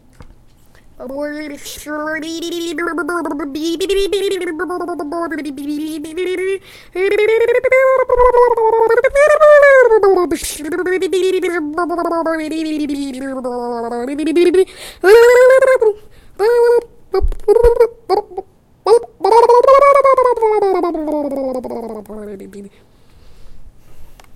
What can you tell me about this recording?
A burbling sound.
bubbling, burble, burbling, drowning, under-water